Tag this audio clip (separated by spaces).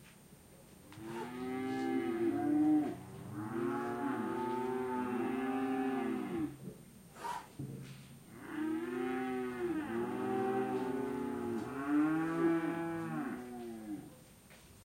farm countryside calf calves stable mooing